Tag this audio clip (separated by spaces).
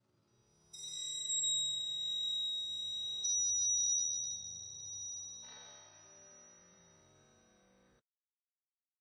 Suspense Effect Foley